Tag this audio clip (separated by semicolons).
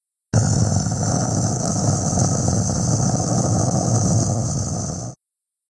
angry; animal; awesome; bad; dark; dismal; evil; feral; freaky; growl; horror; macabre; mean; monster; not-nice; odd; scary; scary-animal; weird